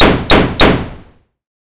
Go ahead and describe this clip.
Machine Gun 002 - triple shot
Triple shot of a machine gun (002). Made with audacity, from scratch
firing, gun, machine, shooting, shot, weapon